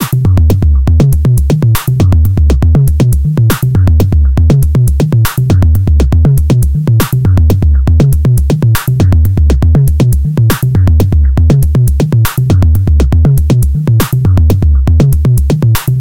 Loop created in NI Reaktor